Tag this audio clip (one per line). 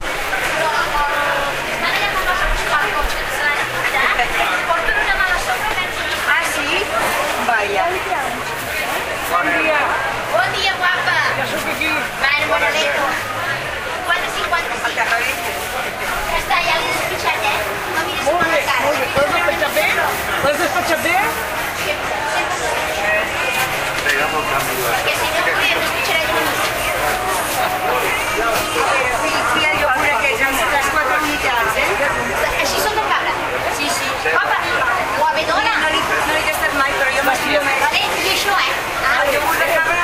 people
market
figueres